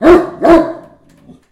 Female Great Dane Bark7
Female Great Dane Bark